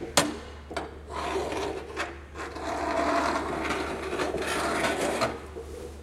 Scraping a metal bench with keys. A typical metal on metal sound.